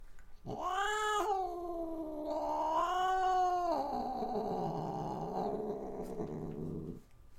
Cat howling and growls.